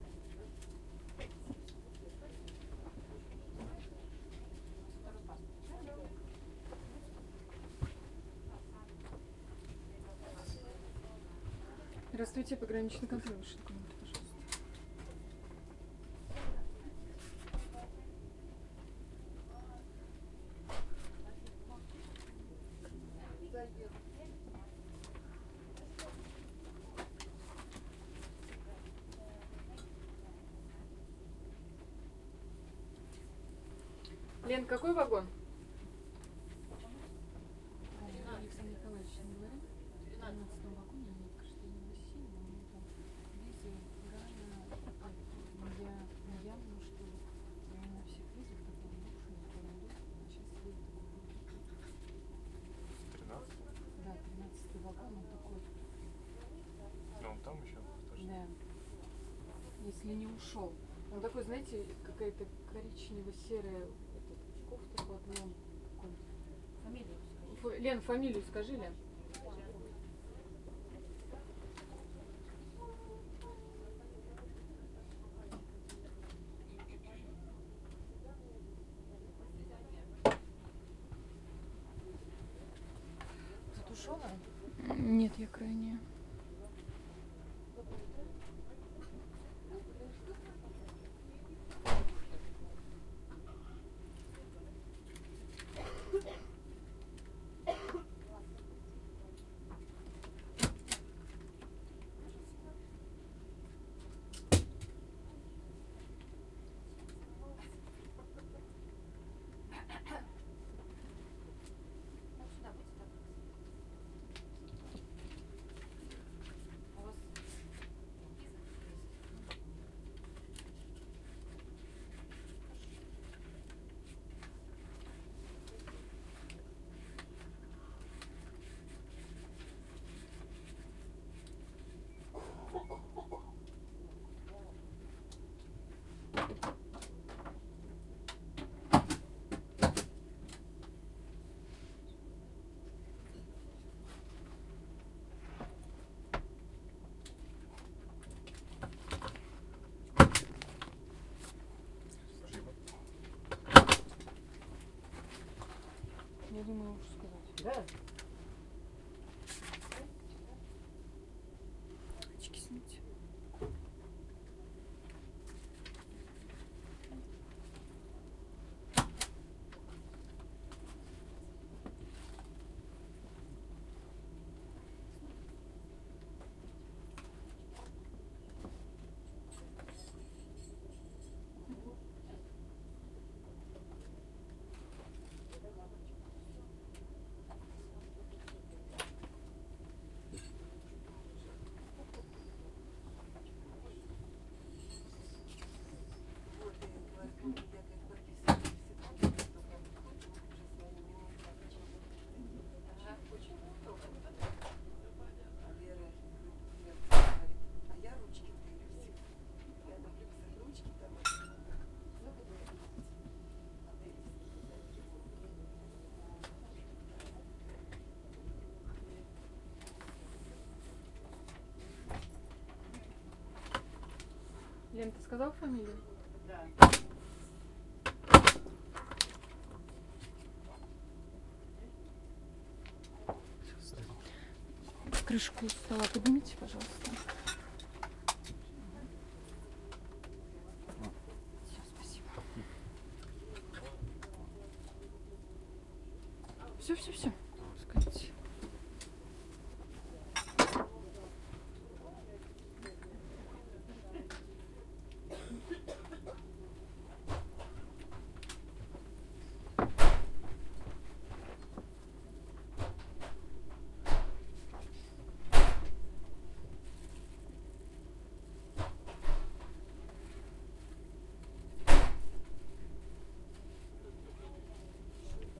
Russian officials check documents in train at the Russian - Finland border. St.Petersburg - Helsinki
In cabin. Passports being checked by Russian officials. Recorded with Tascam DR-40.
border, field-recording, russia, stamp, trans-siberian